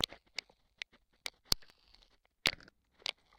Contact mics bad wiring
My homemade contact mics needed some tweaking. I believe I have now solved this problem, but previously I used to get a lot of bad connection noises like this.